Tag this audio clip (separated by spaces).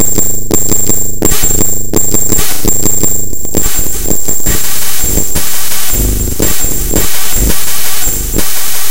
fubar processed